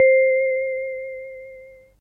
Vibrato Vibraphone C
Programmed into Casio CT 1000p Vintage Synth
1000p, Casio, CT, Synth, Vintage